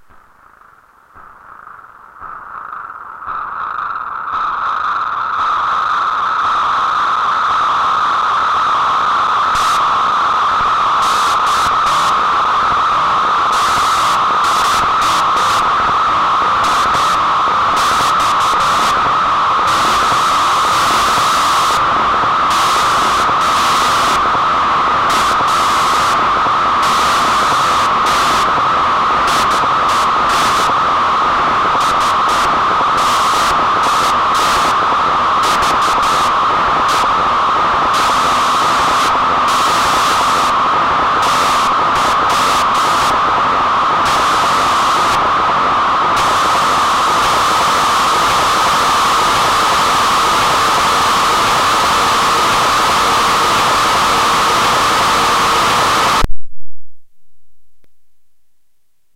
Pulsing Static 03 (w/ intermittent noise)
Variation of pulsing static, with intermittent noise. Is it a distant call for help over the radio, or something else???
Recorded with the Korg Monotron Analogue Ribbon Synthesizer.
You do not need to reference specific sound name within your credits, but you can credit under title such as "Additional Sounds".
ambient, silent-hill, drone, static, creepy, korg-monotron, sinister